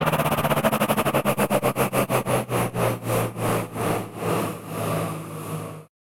Sweep down - mod. 2
sweeper down sound heavy processed and layered
source file:
digital, experimental, freaky, fx, glitch, lo-fi, loud, noise, sound-design, sound-effect, strange, weird